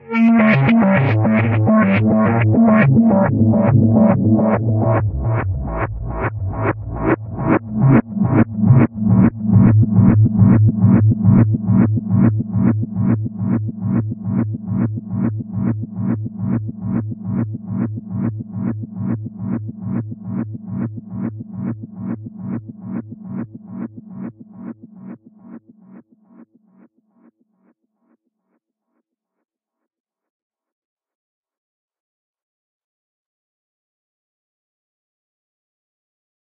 The Torrent
Reversed and delayed falling synth melody
[BPM: 105]
[Key: ~A minor]
Arp, The, FX, Delay, Loop, Water, Bounce, Reverb, Peer-to-Peer, BPM, Synth, Torrent, Rvb, 105, minor, Drowning